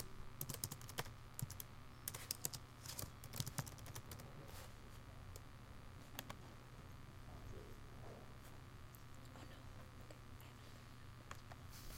Someone typing emails, very light typing. Recorded up close.
keyboard, typing, computer, laptop, pc